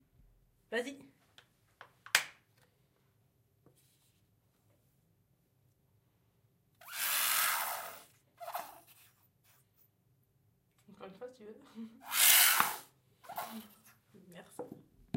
bouteille savons vide
An empty soap bottle pressed.
Organic sound + clic
Recorded with a Zoom H2N
air, bottle, clic, empty-bottle, organic, soap, squish